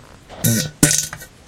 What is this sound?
fart poot gas flatulence flatulation explosion noise weird beat aliens snore laser space
aliens, beat, explosion, fart, flatulation, flatulence, gas, laser, noise, poot, snore, space, weird